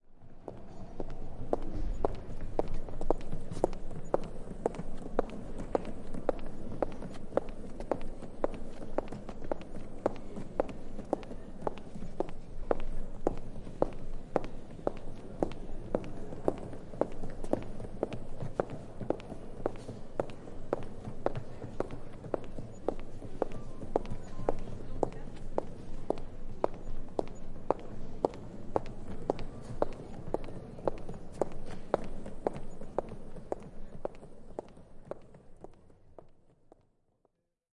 2 heels and a wheel case
steps are slaming on an airport floor followed by a wheeled case. Close up recording, the heels a little on the left and the case on the right hand side. Smooth voices arround and two ring tones.
Brest, France, 2012
Recorder with Schoeps AB ORFT
recorded on Sounddevice 744T